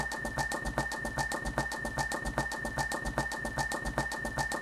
rhythmical cups hitting sides with weird whistle in background
cups, rhythm